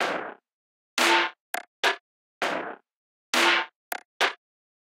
Glitch beat loop
Processed Beat loop 100bpm. Glitchy, dark and a metallic. Falls behind beat intentionally.
Beat, Dark, Glitch, Industrial, Loop, Trance